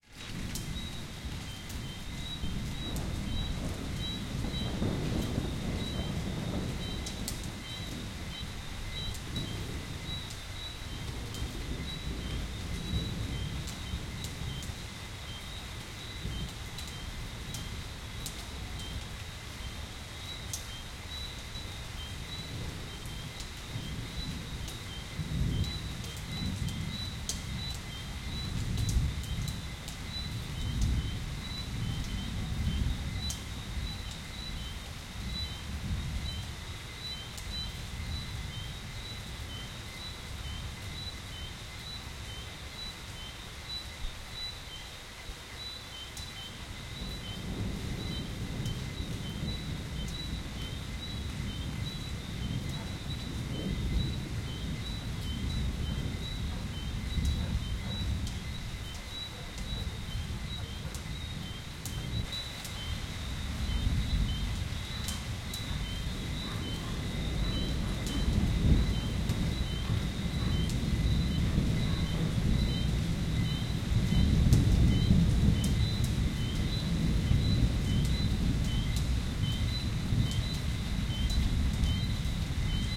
Crickets Rain and Thunder
Field recording of rain crickets and some thunder in the country